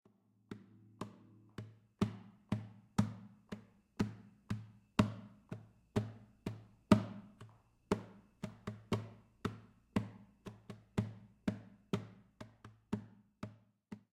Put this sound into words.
Guitar Slap
Me slapping the base of my acoustic guitar.
guitar, slap, MTC500-M002-s13